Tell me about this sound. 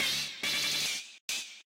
Skipping Record
A few sample cuts from my song The Man (totally processed)
glitchbreak, glitch, breakcore, freaky, techno